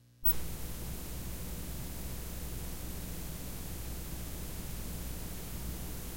cassette tape hiss poof on
hiss, poof, tape, cassette